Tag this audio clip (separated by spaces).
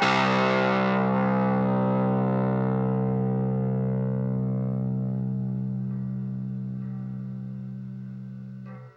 amp chords distortion guitar miniamp power-chords